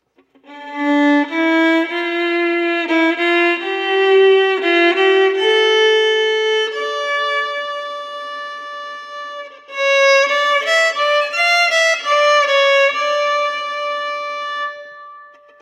Another sad violin lick